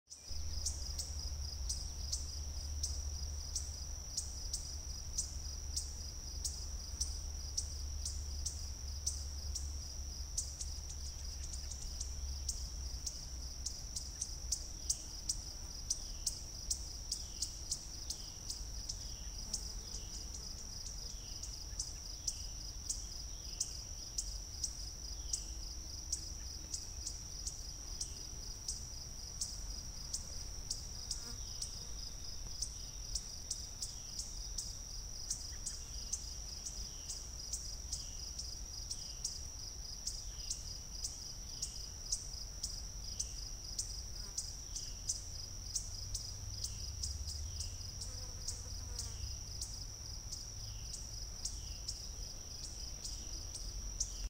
palmyra Cove July 5 2021 4
Recorded with a phone and edited with Adobe Audition.
Palmyra Cove Nature Preserve, Palmyra, NJ, USA
July 2021
birds, field-recording, nature, ambiance, forest, insects, summer